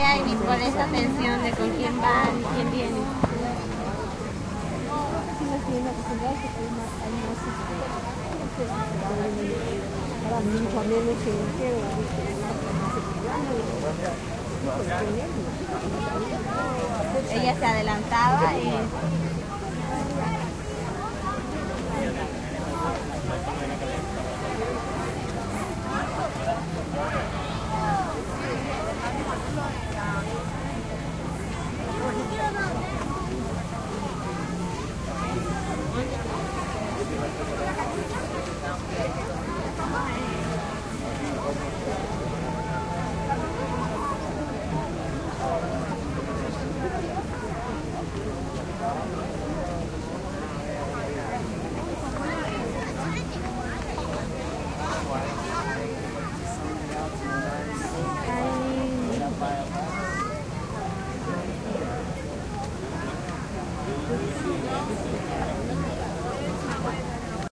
zoo waitinginline
Walking through the Miami Metro Zoo with Olympus DS-40 and Sony ECMDS70P. Waiting in line outside the zoo.
animals, field-recording, zoo